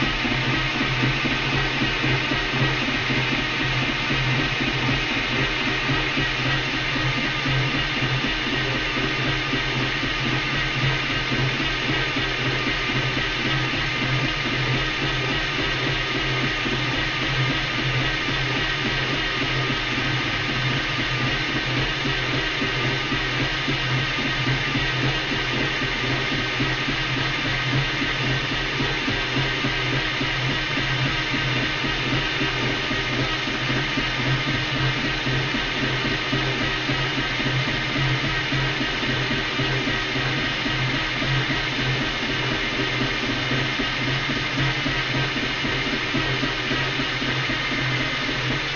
Rotating tank turret planetary electric motor
KitchenAid blender motor sound pitched down to resemble a bigger motor used for rotating bigger things. Cut to suit looping in a game.
electric, motor, tank, turret